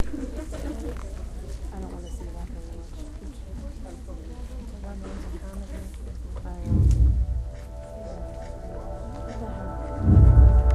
Snippets of sound in between the coming attractions and commercials inside a movie theater.
movie, theater